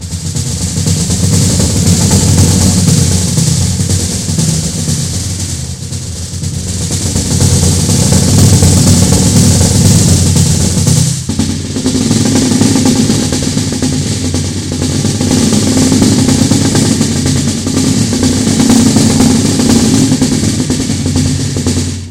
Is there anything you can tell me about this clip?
drum fragments music composition toolbox
music, toolbox, drum, fragments, composition